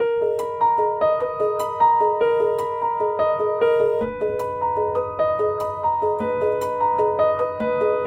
prepared piano loop 1
Sample of prepared piano recorded with an Audio-Technica AT2020
piano, repetitive, prepared, loop